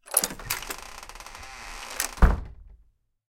A door open/close sound I made recording doors around my school. Recorded on a ZoomH1 and edited in Audition.